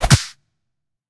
Punch sound made with a combination of different recordings.
Punch, Slap, Anime, Fight